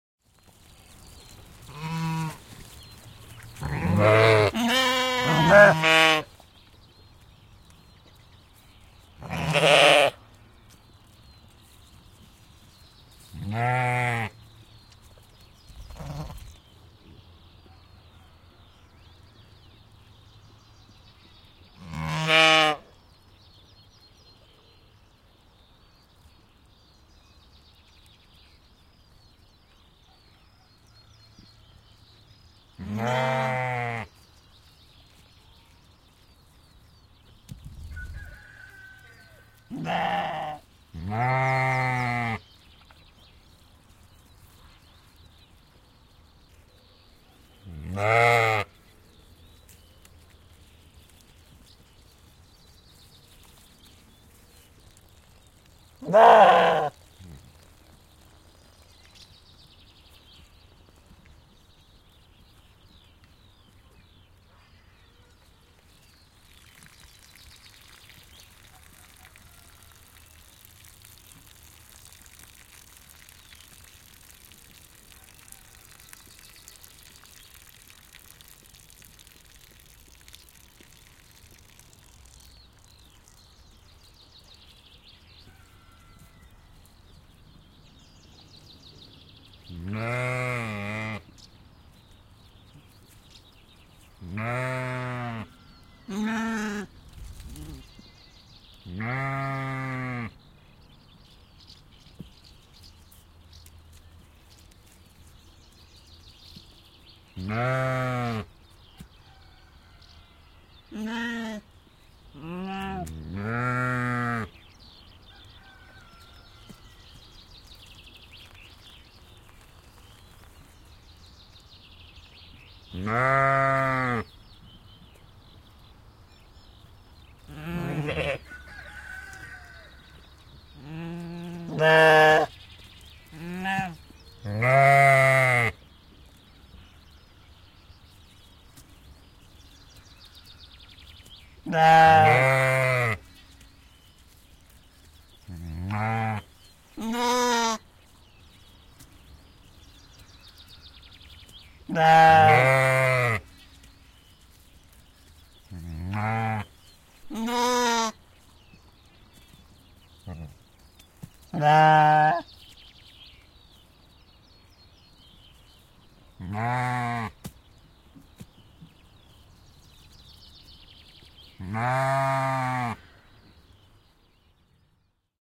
Lampaat määkivät / A small flock of sheep bleating, piddling, birds in the bg
Muutama lammas määkii laitumella, pieni katras, liikehtivät, pissaavat. Taustalla pikkulintuja ja kukko kaukana.
Paikka/Place: Suomi / Finland / Vihti, Ojakkala
Aika/Date: 10.07.1995
Lammas, Animals, Yle, Soundfx, Domestic-Animals, Suomi, Yleisradio, Finnish-Broadcasting-Company, Tehosteet, Sheep, Finland, Field-Recording